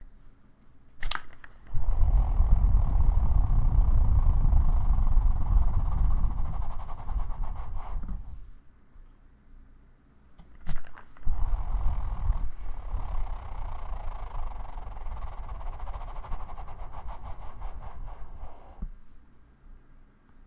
spinBlow with 02
I spun a zoetrope toy while I blew against the motion.
vibrate, zoetrope